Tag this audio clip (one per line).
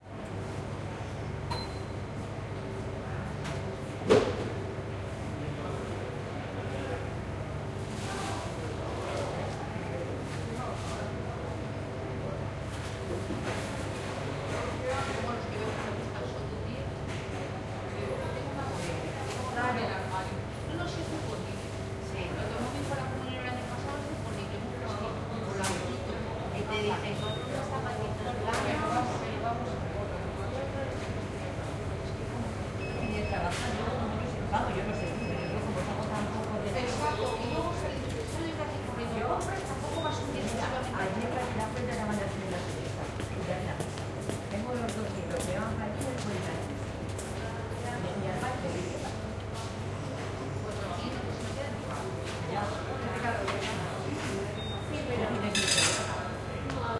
market
mercado
Castilla-Le
people
fish
n
field-recording
Spain
food